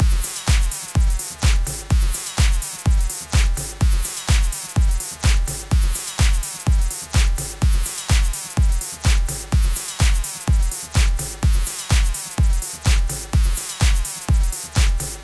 clubgroove1-4 bars
The sound are being made with VST Morphine,Synplant,Massive and toxic biohazzard.
club, dance, Glubgroove, house, loop, music, samples, techno, trance